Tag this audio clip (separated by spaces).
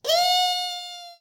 e effect game local multiplayer pentatonic scale sound